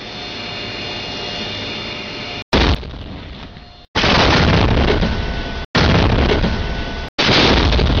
Tank standby and shot. Extracted for use in a real-time strategy game.
Note that while the video uploader may not be a soldier, the video material was made by an US Army soldier during duty.